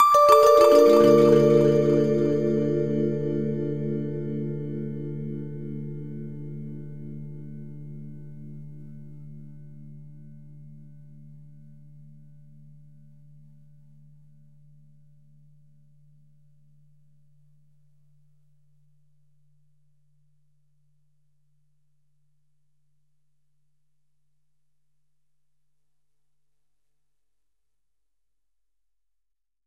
Sound FX1
Bells effect made by me.